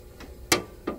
Electric Swtich - Short 3
Bathroom Light switch
bathroom, light, switch